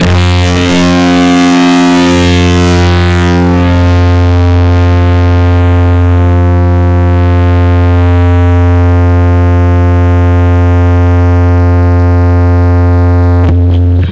A random sound from the guitar.